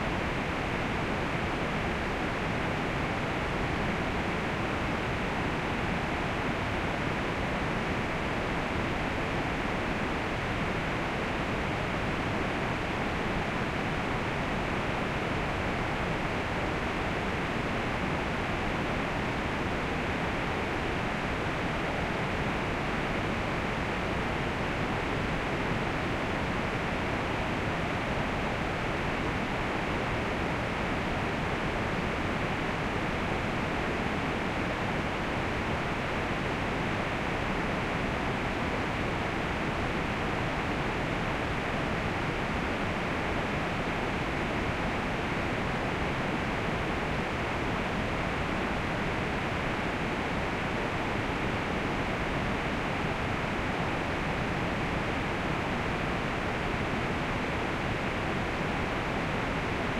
Distant Waterfall - Silence, Ambiance, Air, Tone, Buzz, Noise
This is a series of sounds created using brown or Brownian noise to generate 'silence' that can be put into the background of videos (or other media). The names are just descriptive to differentiate them and don’t include any added sounds. If the sound of one is close, then try others in the pack.
Background, Noise, Plain, Simple, Soundtrack